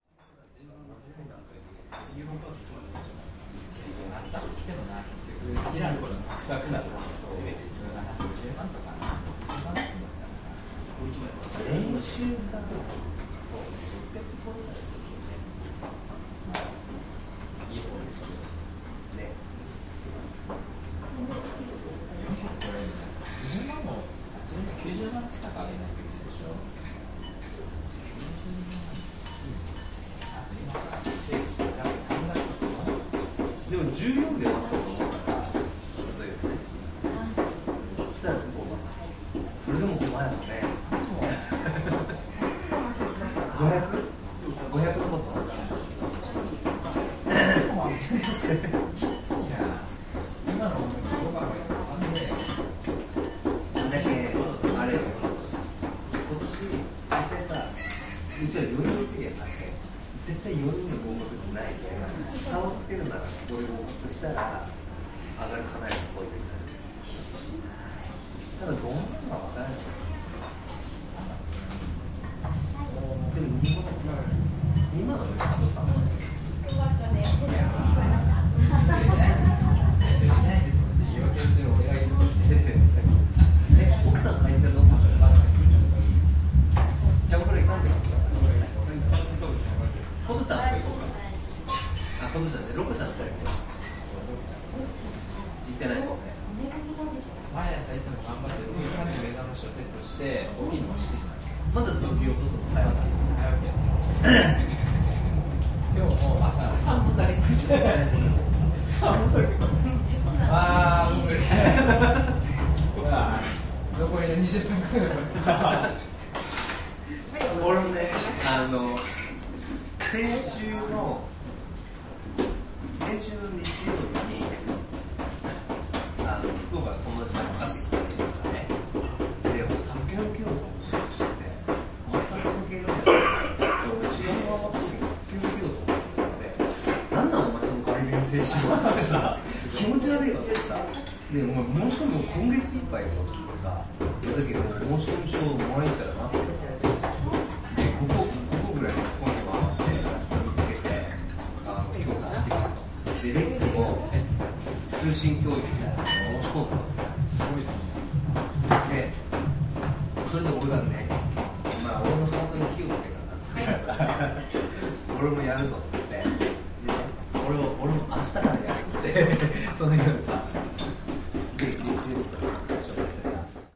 0398 Japanese Restaurant 2
Traditional restaurant. People talking in Japanese. Cutlery. Subway over the restaurant.
20120807